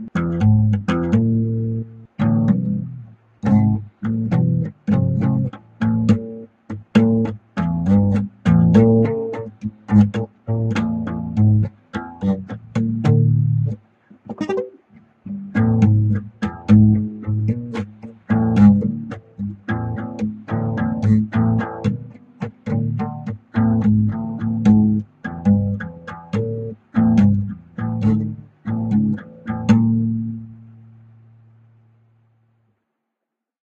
20090109 guitar playing
guitar, songs